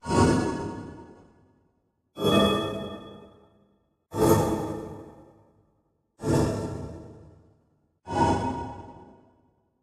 Holy Protection Skill Buff
armor, audio, aura, buff, energy, game, game-sound, heal, holy, magical, priest, protection, rpg, skill, spell, witch